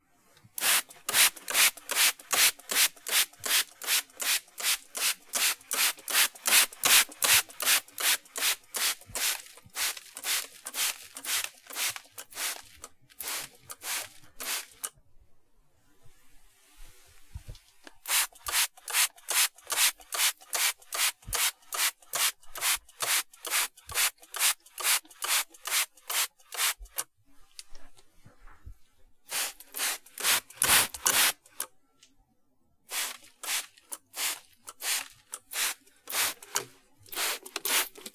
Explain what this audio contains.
Spray Bottle sounds
febreeze, spray, spray-bottle